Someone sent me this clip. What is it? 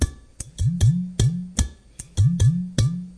Playing a samba rhythm - actually the part of the surdo, the bass drum in Brazilian samba baterias - on a sphere shaped glas vase, tapping with one hand on the outer surface, with the other on the opening, thus producing a low tone, which resembles the tone produced by an udu. The udu is an African drum originated by the Igbo and Hausa peoples of Nigeria, normally built of clay. Vivanco EM35, Marantz PMD671.
samba, bass, percussion, vase, udu, surdo, rhythm, ethno